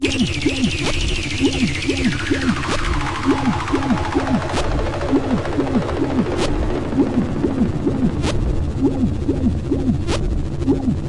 Signal from KlumpokB
Captain, we've had reports of a strong signal emanating from the surface of Klumpok B. Time to investigate.
Pulsating drone from various synth samples from my analog collection. Small amount of reverb and edited/multitracked looped in Roxio sound editor.
signal, Movie, loop, cinematic, fx, Free, Space, dark, fi, sci, Ambient, Noise, Film, Drone